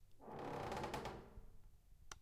Wood door creaking
creaking, door, puerta